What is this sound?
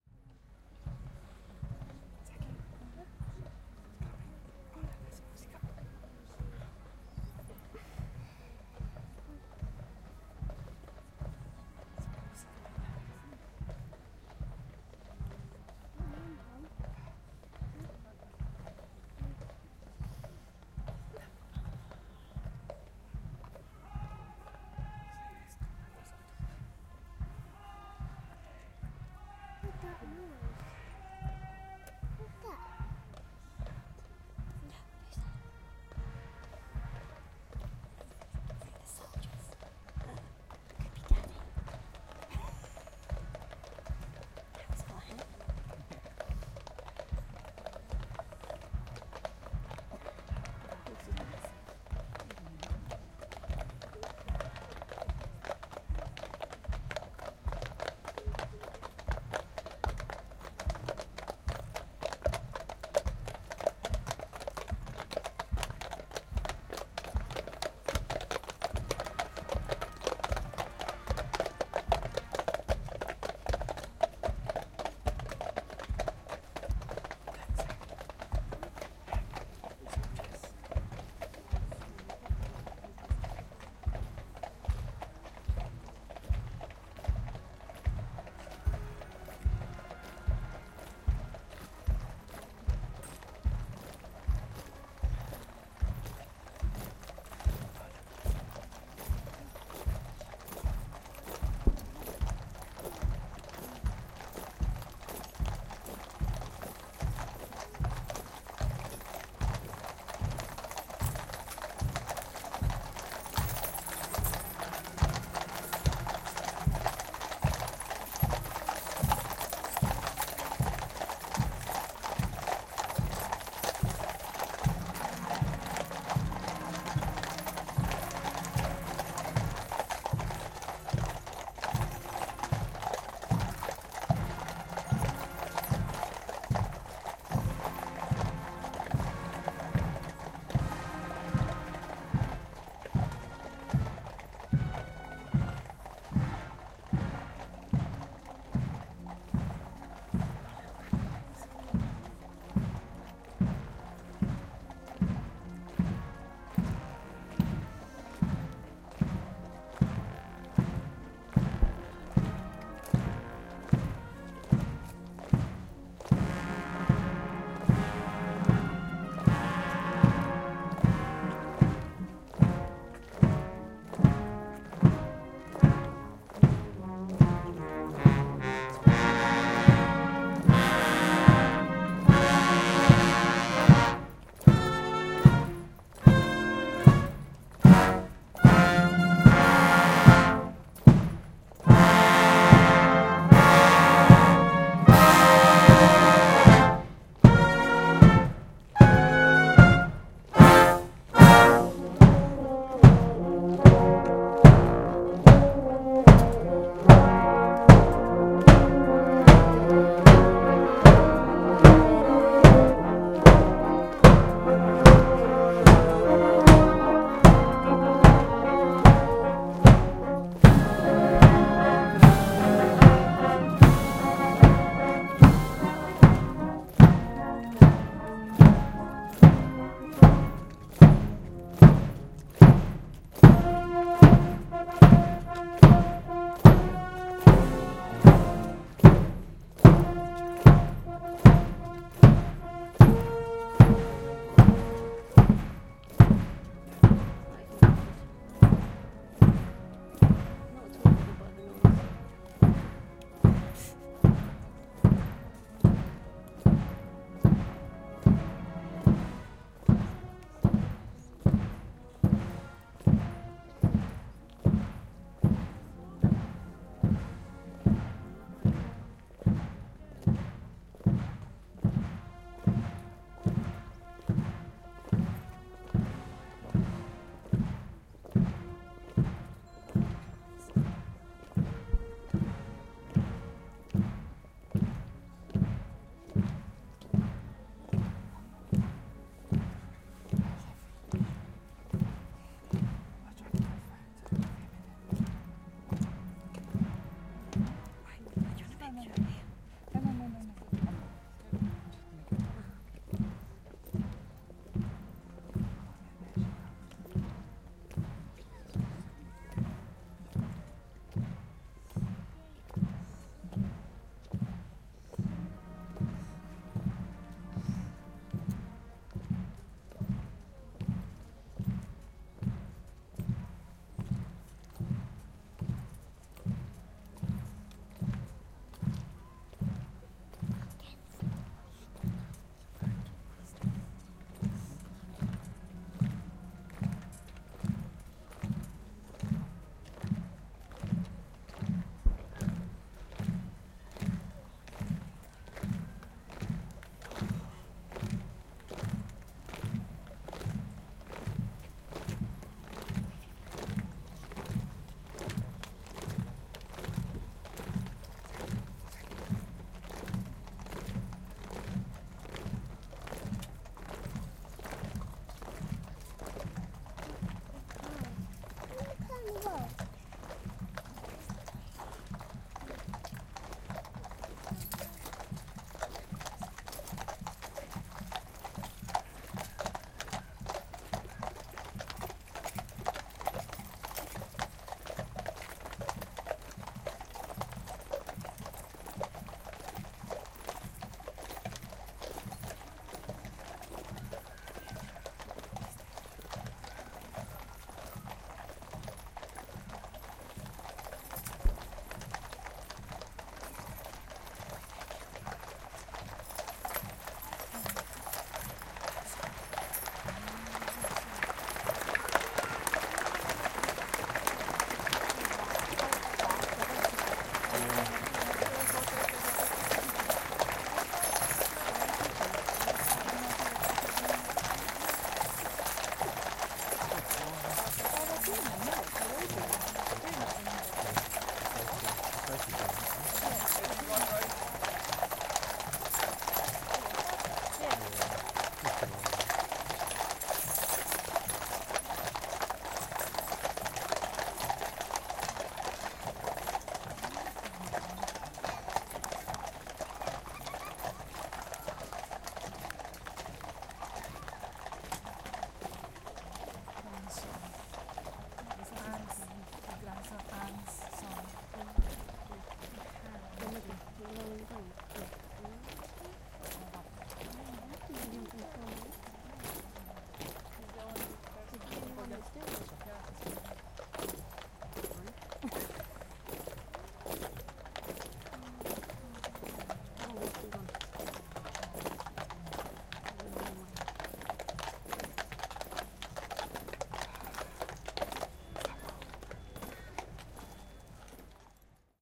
The full procession for Her Late Majesty, Queen Elizabeth II, as they march down the Long Walk towards Windsor Castle on 19th September 2022. Raw and unedited, including sounds of marching soldiers, trotting horses, a marching band, applause from the crowd, and distant cannon fire every minute. The vehicle carrying The Queen's coffin drives past at around 5:40, roughly 3 meters from the microphone.
An example of how you might credit is by putting this in the description/credits:
The sound was recorded using a "Zoom H6 (MS) recorder" on 19th September 2022.